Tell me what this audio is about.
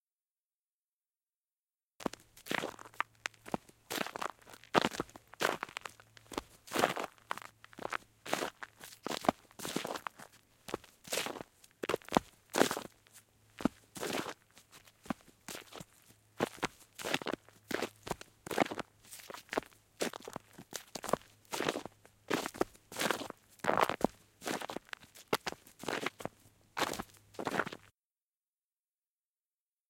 A gaitful walk
A galloping walk along a stony path.